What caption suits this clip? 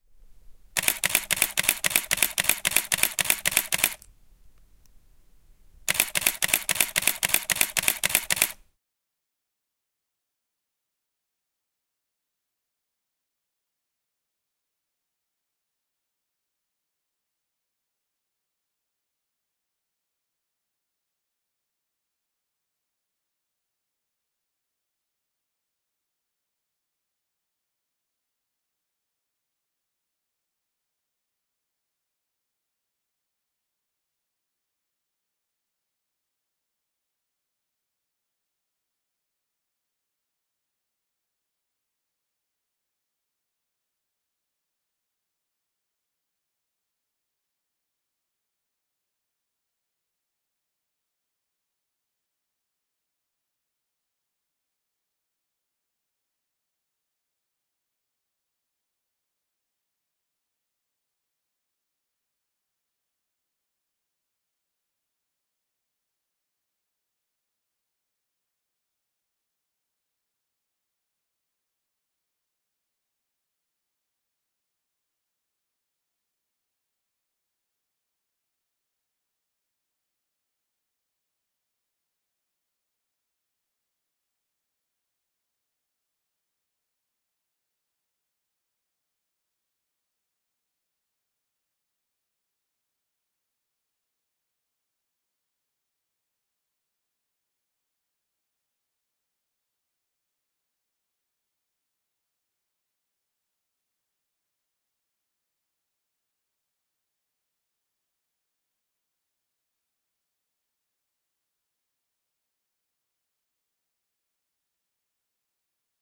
Canon EOS 5D Mark II - Burst Shot
A Canon EOS 5D Mark II - Sound of burst shot.
camera, click, environmental-sounds-researchshutter, photo